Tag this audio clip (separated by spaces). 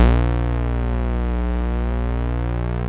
goa progressive psytrance